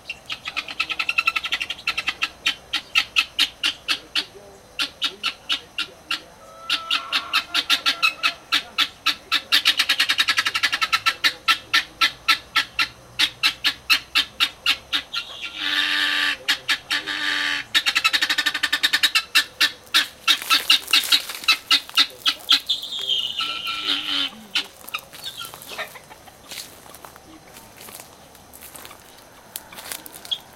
call from the Helmeted Guineafowl (Numida meleagris), the best known of the guineafowl bird family, Numididae. I would describe this sound as 'unpleasant' but hey... Recorded with a Cannon camcorder